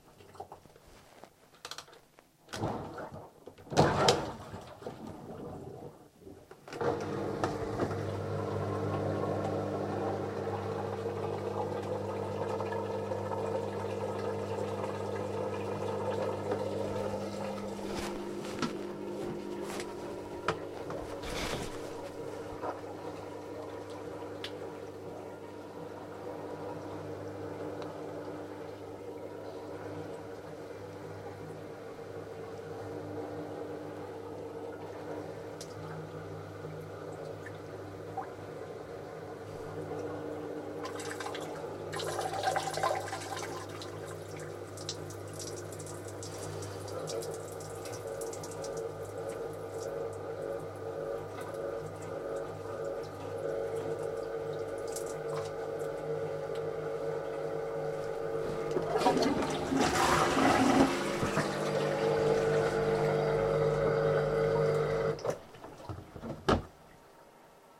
Washing Machine 6
spin, wash, bathroom, dripping, Machine, bath, spinning, sink, faucet, water, domestic